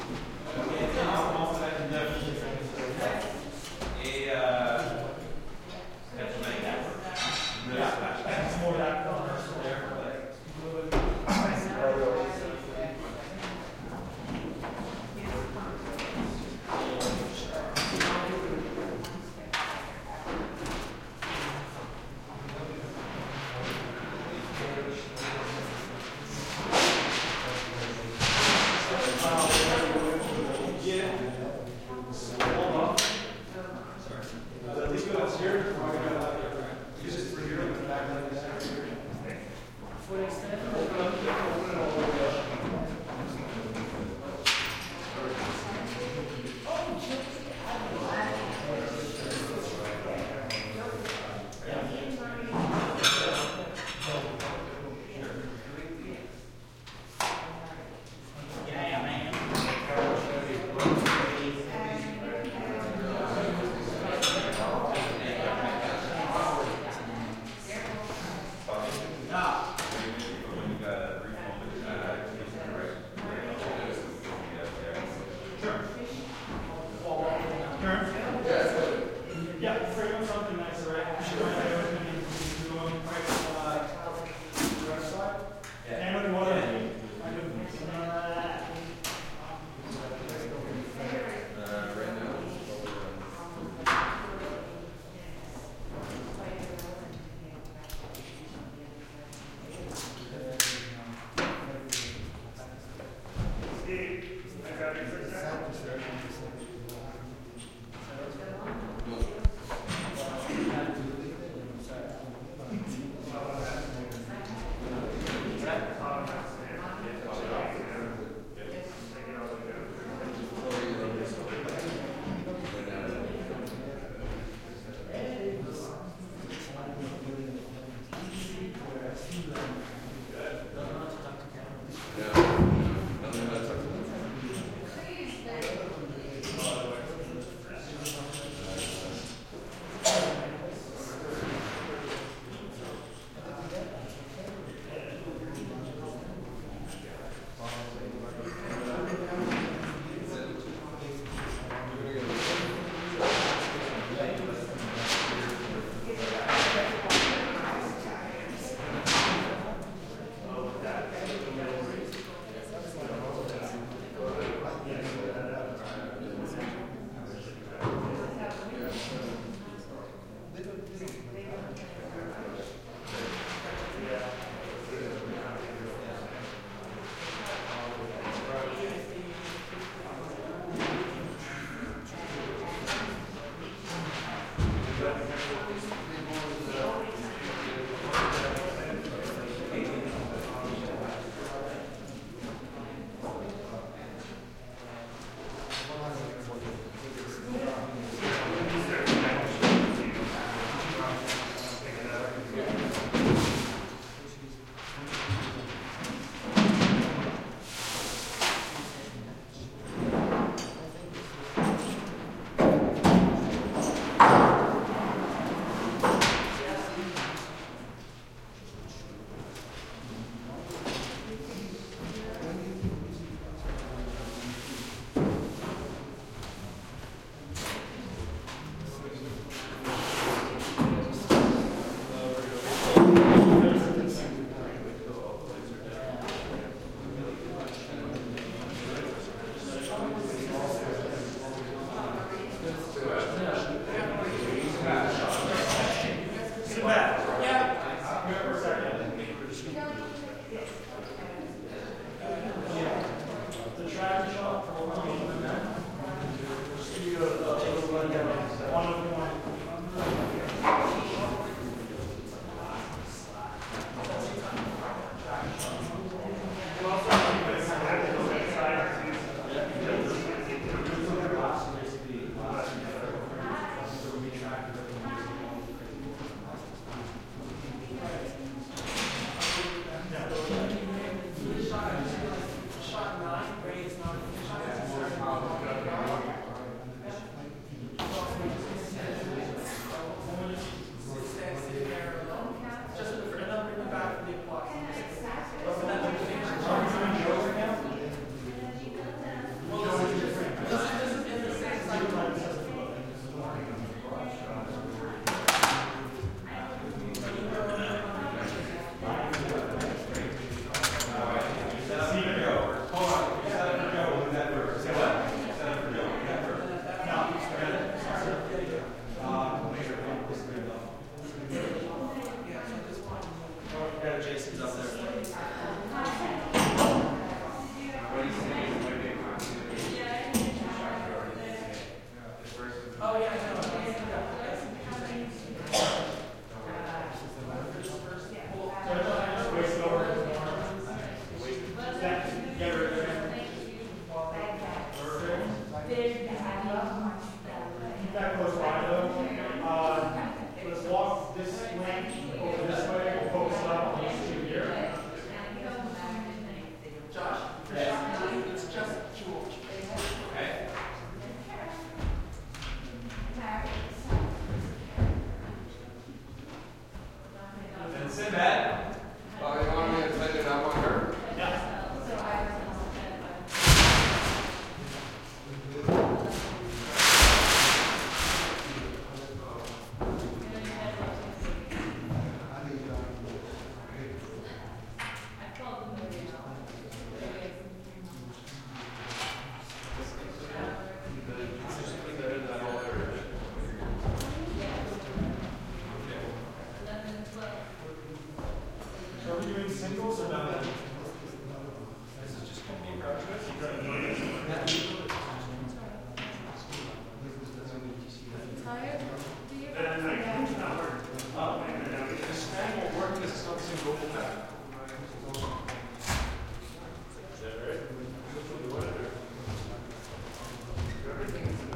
film set roomy voices and gaffers working thuds, gels rolling up in large hall2
crew, film, gaffers, roomy, set, voices, working